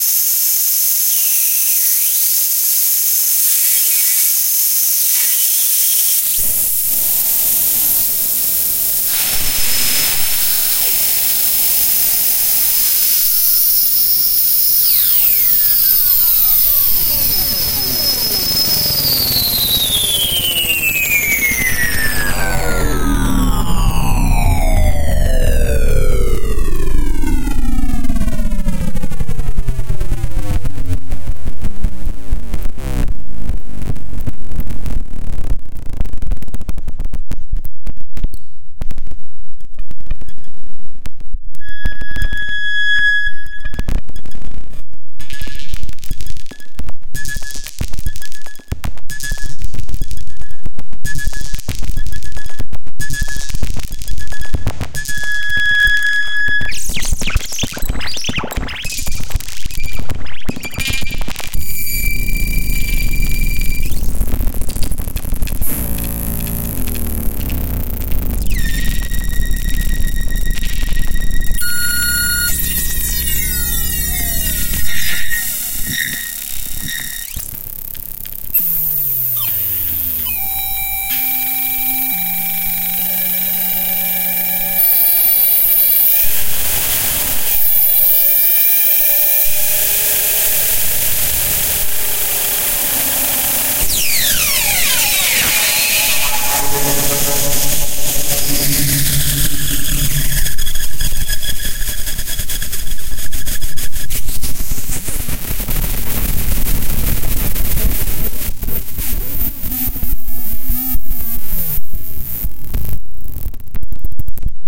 Fx Glitch 3
3, fx, glitch